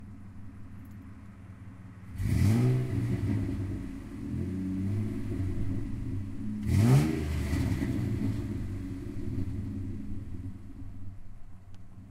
Cars - truck idling and revving
A Dodge Dakota truck with loud exhaust idling and being revved up.